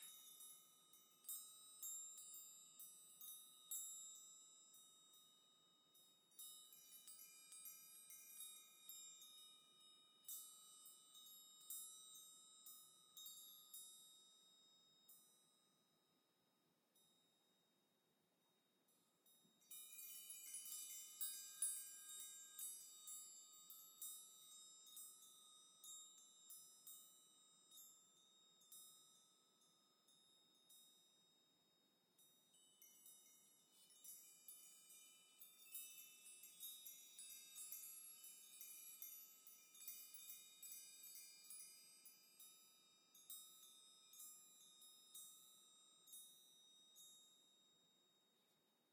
Key Chimes 02 Light-Shake
Close-mic of a chime bar made from various size house keys, lightly shaking the stand. This was recorded with high quality gear.
Schoeps CMC6/Mk4 > Langevin Dual Vocal Combo > Digi 003
chimes, jingle, keys, sparkle, spell, ting, tinkle, tinkles